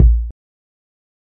606 recorded thru a ssl channel strip